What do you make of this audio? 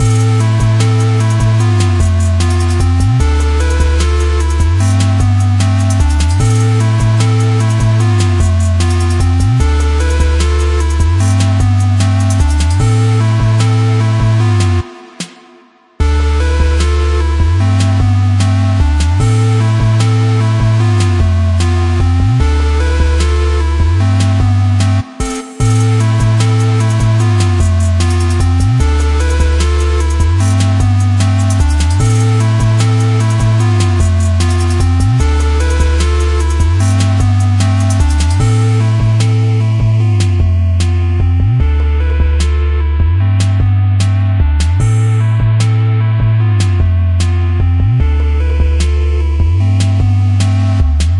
Arcade Trap Loop
I tried to make a modern trap arcade type beat to make the videogame sound fresh, 'cause it's 2020 and normal chiptune is a little boring :p
hip, hiphop, music, videogame, trap, bpm, modern, hop, beat, games, song, arcade, loop, video